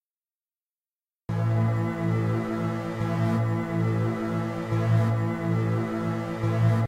140 sound fx 9
140-bpm dubstep sound-fx
140-bpm, dubstep, sound-fx